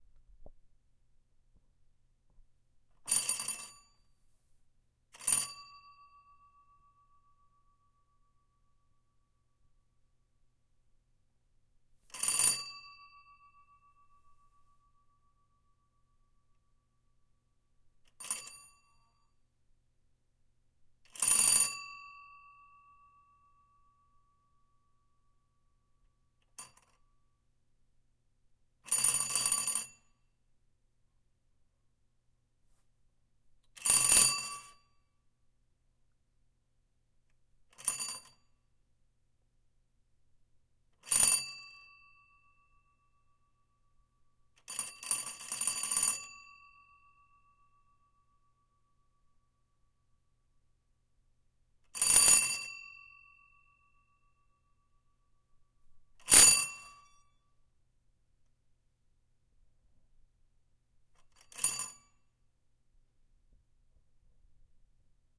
Victorian Twist Doorbell 02
Unedited recording made with Zoom H5
Victorian style "twist" doorbell popular before electric doorbells. You twist the handle on the outside that rotates a double sided hammer onto the bell on the other side of the door. I could NOT find a recording when I looked.
I initially made two recordings, I will likely make more later.
This is a modern "cheap" recreation doorbell, as they are hard to find these days. I know some people with the genuine article on their home doors however I never get around to recording them. Recording done in theatre.
antique, bell, chime, door, doorbell, Edwardian, folly, mechanical, old, raw, ring, ringing, sound-museum, twist, Victorian